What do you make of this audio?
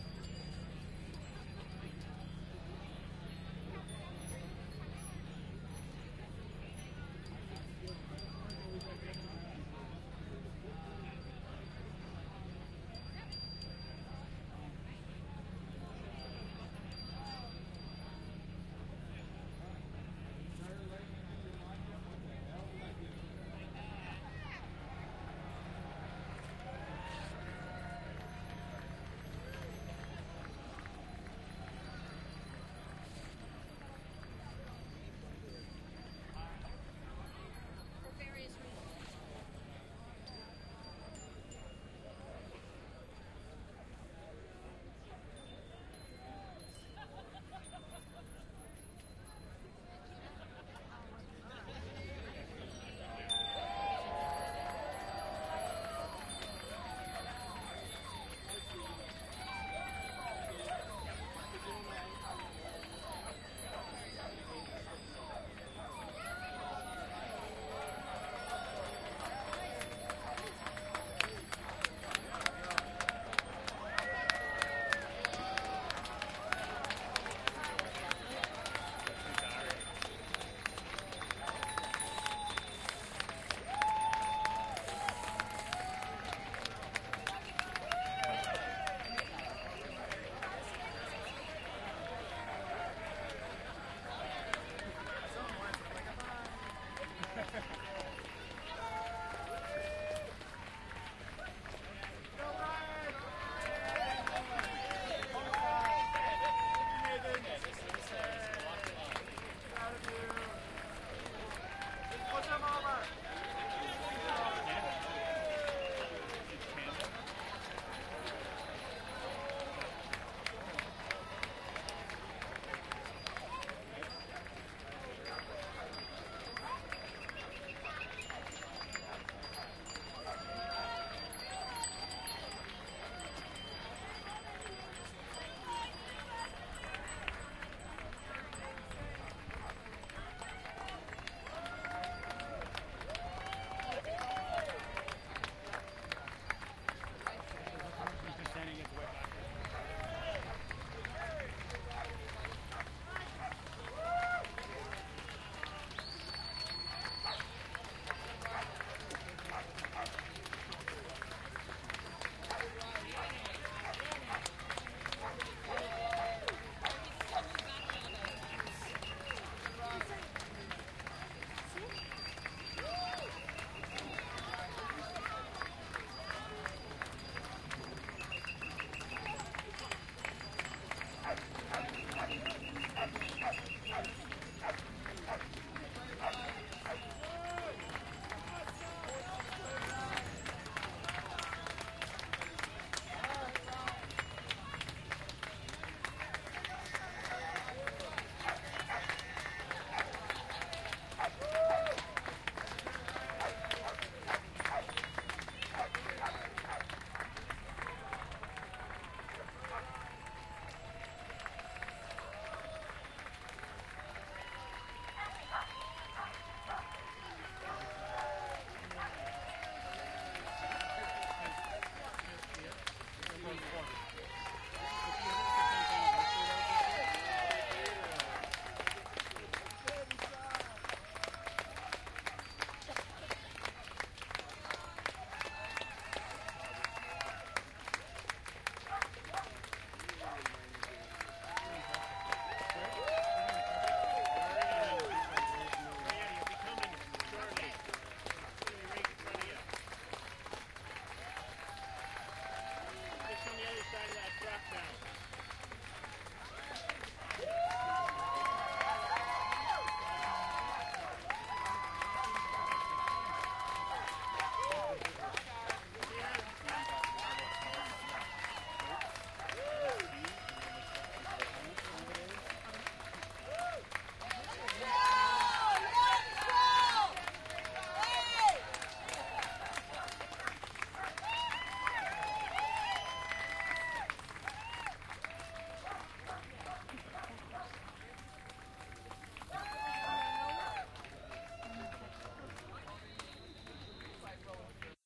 072510 crowd cheer 02
Stereo binaural field recording of a crowd applauding. Some cowbells, some dog barking.
applauding, applause, binaural, cheer, cheering, clapping, crowd, field, recording, stereo